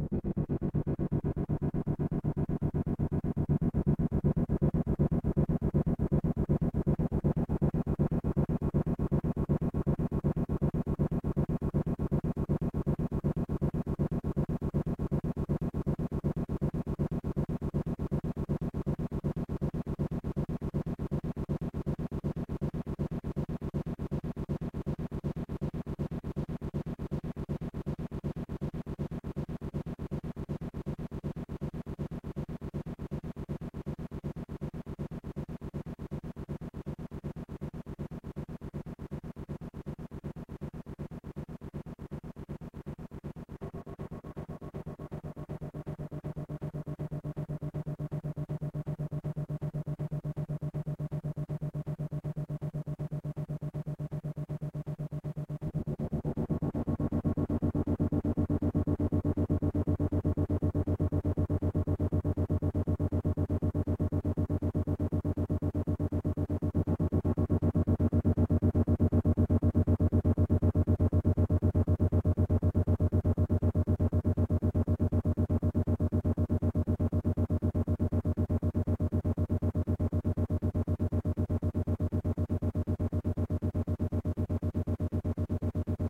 This was recorded by plugging in a smartphone through aux cord into my microKORG. The the audio source is then manipulated by the synthesizer and creates something entirely new and then outputs that sound directly into a Sony ICD-UX560F recorder.